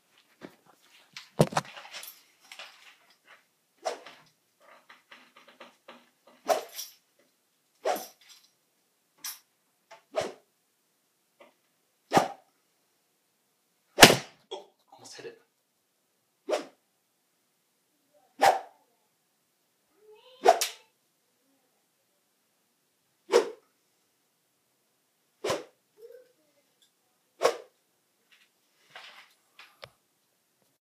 Swing Whoosh
Whoosh sound recorded in a basement in VA, USA. Use for swords, bats, punches and kicks too. Recorded on an iPod swinging a long, skinny, plastic thing.